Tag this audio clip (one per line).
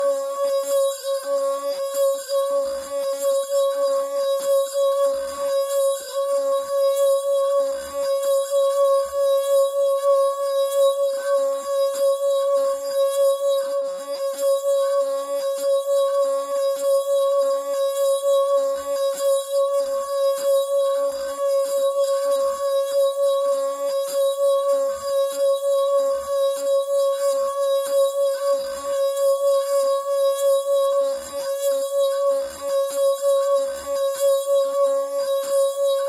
clean
drone
glass
hard
instrument
loop
melodic
noisy
note
pressed
pressure
sustained
texture
tone
tuned
water
wine-glass